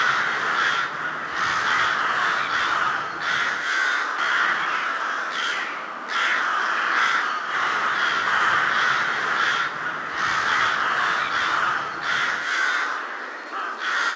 Ambience MurderofCrows 00
A murder of crows loop sound to be used in horror games. Useful for outdoor evil areas where sinister rituals and sacrifices are being made.
ambience, crows, epic, fantasy, fear, frightening, frightful, game, gamedev, gamedeveloping, games, gaming, horror, indiedev, indiegamedev, rpg, scary, sfx, terrifying, video-game, videogames